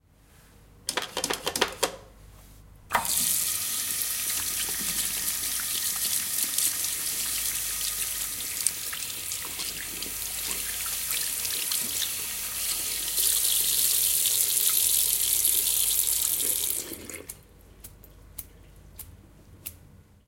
sink washing hands
Sound of hands being washed at a toilet in UPF Communication Campus in Barcelona.
campus-upf, sink, soap, water